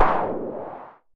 Here's a heap of snapshot samples of the Synare 3, a vintage analog drum synth circa 1980. They were recorded through an Avalon U5 and mackie mixer, and are completely dry. Theres percussion and alot of synth type sounds.